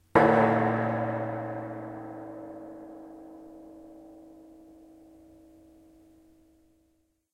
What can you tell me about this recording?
propane tank dark hit 2
Field recording of approximately 500 gallon empty propane tank being struck by a tree branch. Recorded with Zoom H4N recorder. For the most part, sounds in this pack just vary size of branch and velocity of strike.